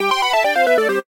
Retro arcade video game end or completed tone
Completed or end musical expression tone for retro arcade game